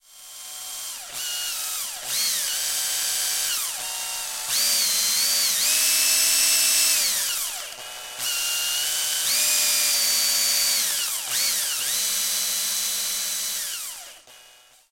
Sound of drill
CZ, Czech, Panska